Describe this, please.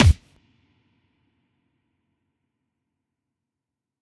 PUNCH-BOXING-01
06.22.16: A punch created from the sound of a leather glove being whipped, processed alongside a thickly-layered kick drum.
metal, low, kickdrum, cinematic, fall, drop, tap, impact, thud, hit, slap, fat, big, land, punch, kick, bang, bass, thump, dud, hitting, bass-drum, boom, kick-drum, object, boosh